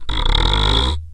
low.grumble.09
idiophone, daxophone, wood, instrument, friction